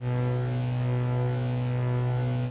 SonoKids-Omni 21
A collection of 27 samples from various sound sources. My contribution to the Omni sound installation for children at the Happy New Ears festival for New Music 2008 in Kortrijk, Belgium.
happy-new-ears, sonokids-omni